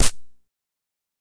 Distorted hi-hat
An analogue synthesized high-hat being run through and distorted by a modified "My First Pianola" children's toy
analogue, bitcrushed, circuitbending, distorted, glitch, hi-hat, percussion, pianola, toy